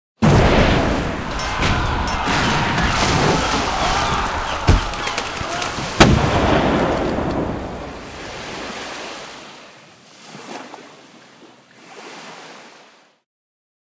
Pirat-battle
Compilation of sounds as a navy battle on a pirate ship
fight, battle, pirate, navy-battle, cannon, ship